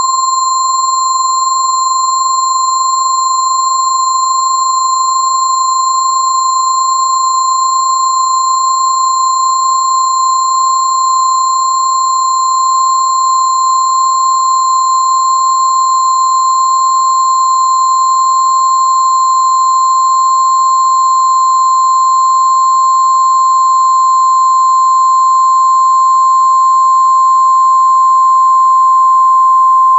Long stereo sine wave intended as a bell pad created with Cool Edit. File name indicates pitch/octave.
bell, multisample, pad, synth